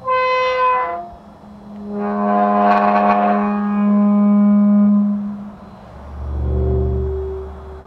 Heavy wrought-iron cemetery gate opening. Short sample of the groaning sound of the hinges as the gate is moved. Pitch rises and falls. Field recording which has been processed (trimmed and normalized).
creak, gate, groan, hinges, iron, metal, moan